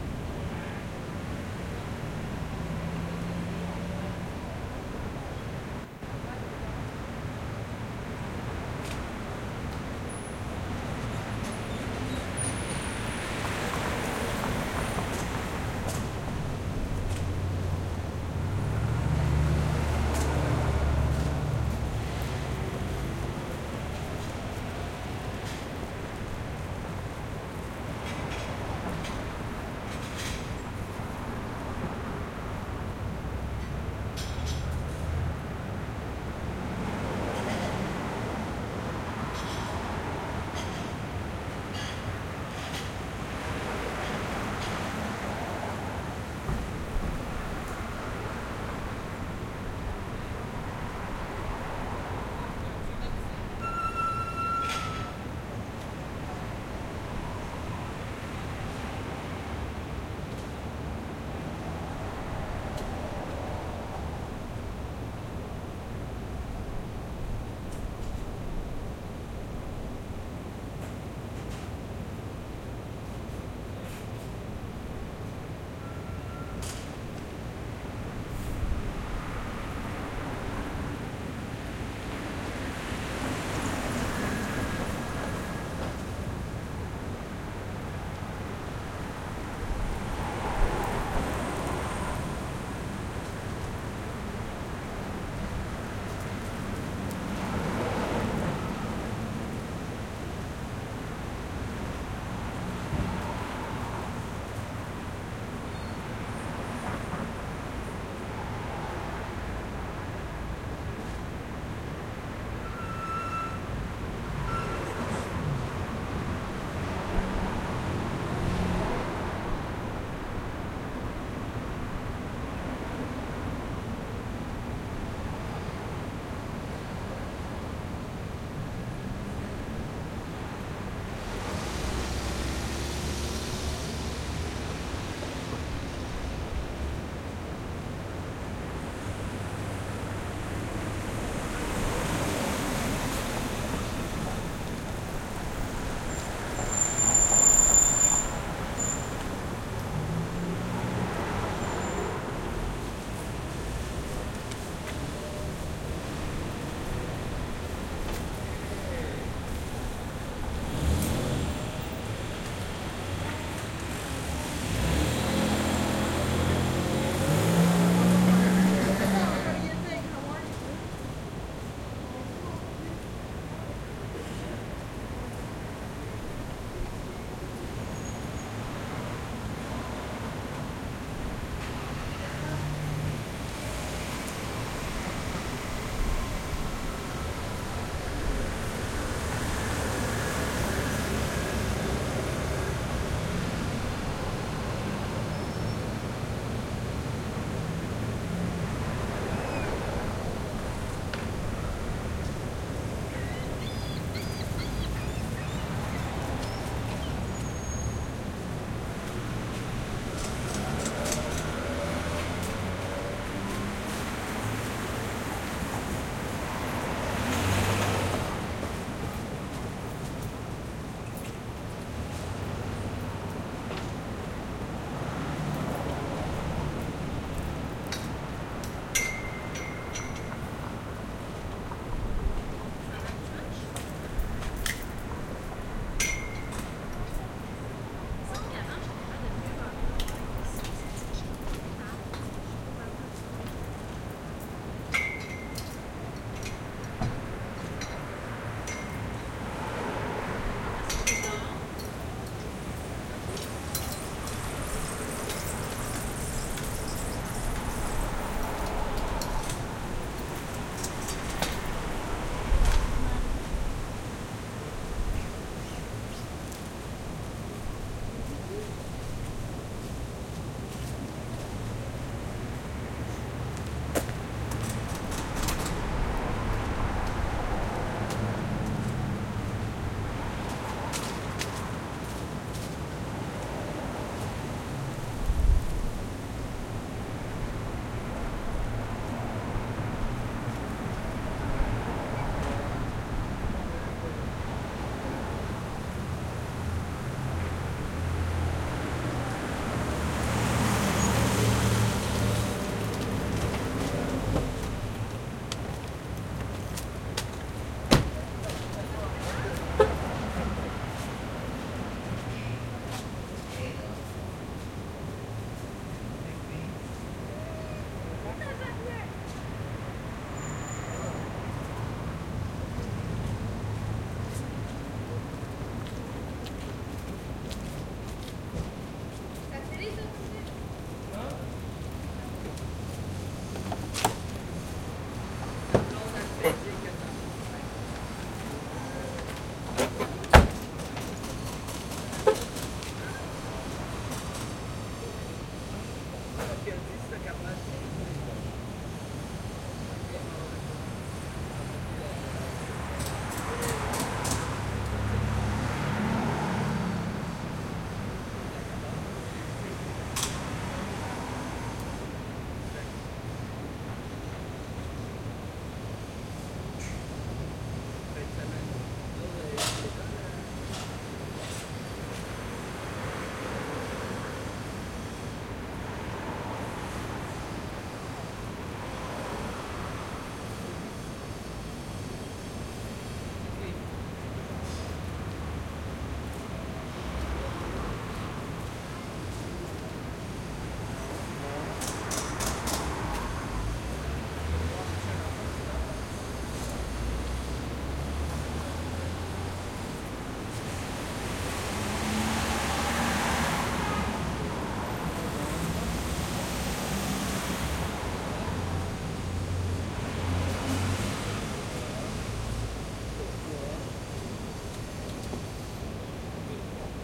street light day people traffic activity soft haze Verdun, Montreal, Canada
activity, Canada, day, haze, light, Montreal, people, soft, street, traffic